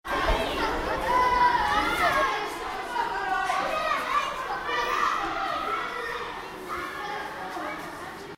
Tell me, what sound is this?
A Limassol school while break.
Kids playing, shouting, laughing
children, school, shouting